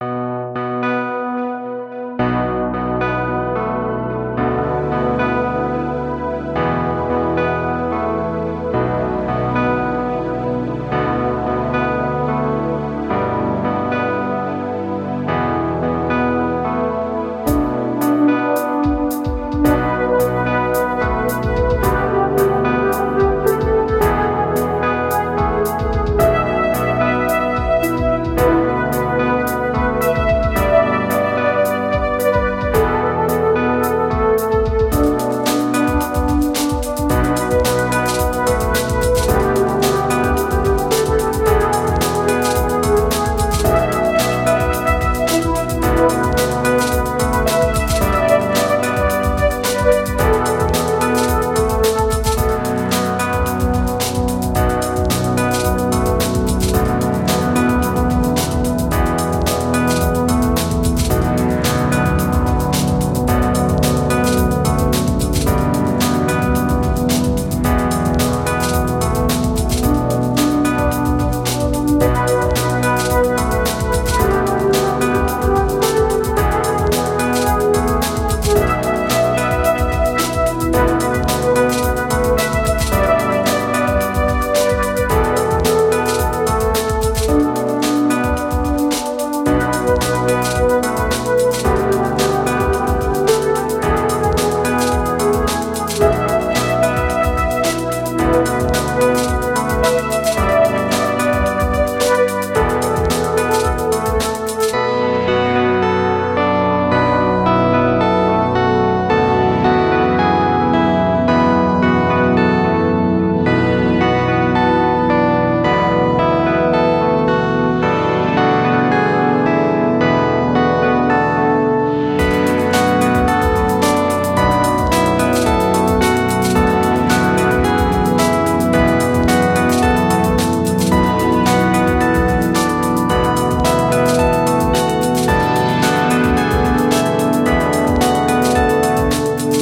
Mystery Solved (loop)
An unfinished musical loop with a mysterious mood suitable for a game soundtrack. The Detective has finally found a key to the puzzle... But what's going to happen next?
cartoon, detective, film, free, game, loop, movie, music, mysterious, mystery, solved, soundtrack